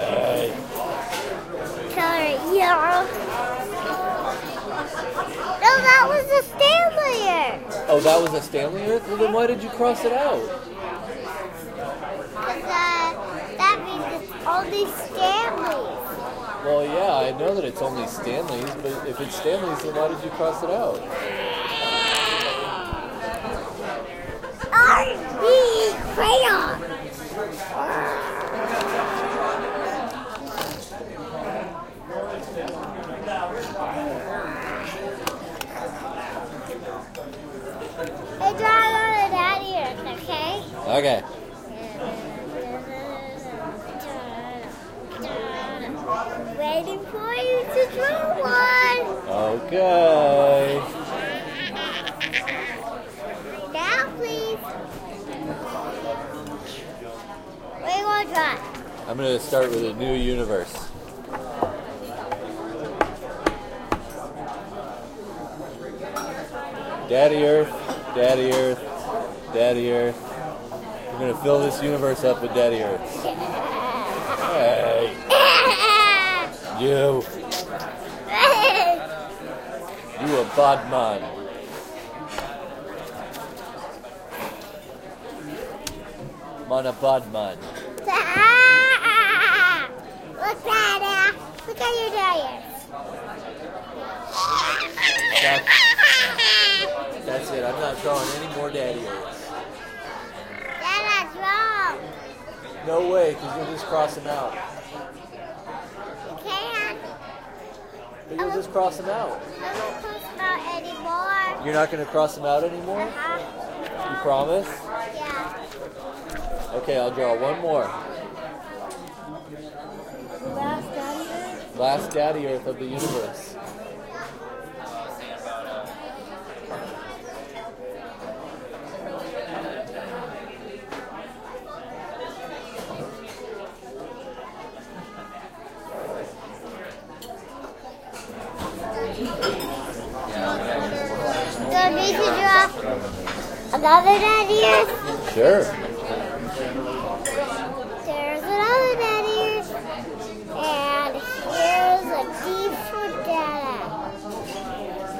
Having breakfast at the Omelettery in Austin, TX